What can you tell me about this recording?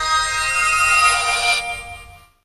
Another take on "magic." Something higher-pitched.
wizard, sparkly, game-sound, witch, wand, fantasy, magic, fairy, spell, rpg, warlock, game, magician, effect, magical, adventure